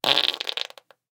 The source was captured with the extremely rare and expensive Josephson C720 microphone (one of only twenty ever made) through Amek preamplification and into Pro Tools. Final edits were performed in Cool Edit Pro. We reckon we're the first people in the world to have used this priceless microphone for such an ignoble purpose! Recorded on 3rd December 2010 by Brady Leduc at Pulsworks Audio Arts.
gas, brewing, rectum, c720, noise, flatulation, bottom, bowel, josephson, flatulence, farts, wind, rectal, amek, embouchure, passing, flatulate, brew, bathroom, fart, breaking, flatus, farting, trump